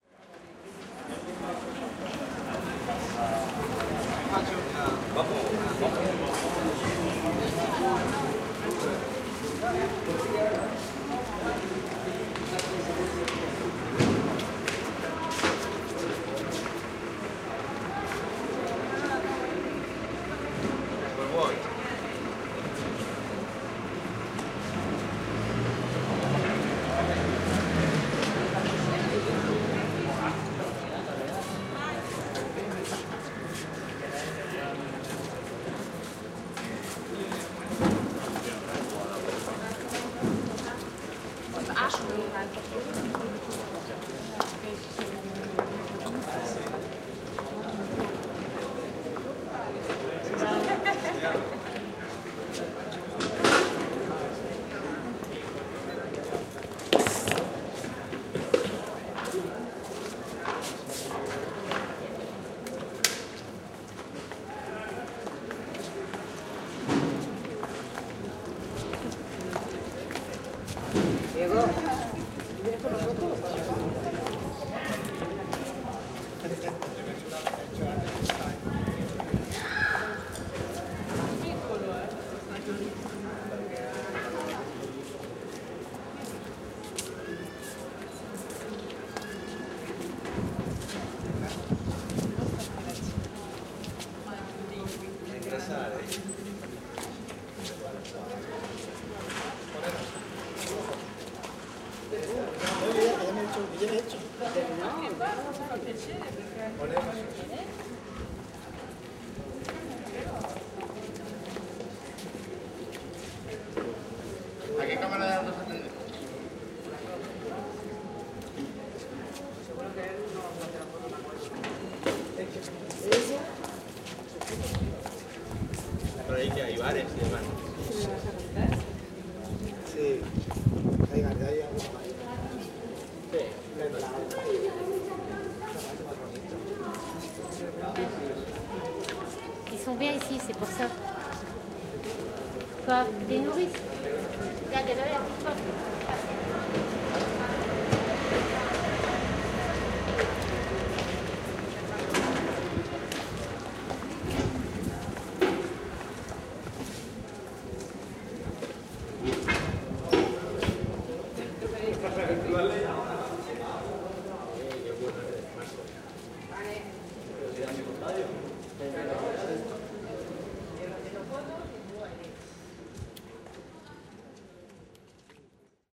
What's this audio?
It’s been a long time since Olympic games were hold in Barna, but at the stadium there’s still people coming and going. Tourists come and go, from here to there shooting photos with their cameras. We hear clearly people’s steps as they walk on a heavy floor. Their voices are clear, with different intonations and on different languages. There’s also some people working hitting on some metallic stuff… And, of course, all of it, colored with an open and veeeeeeeeeeeeeery wide reverb, typical from a modern arena like this one…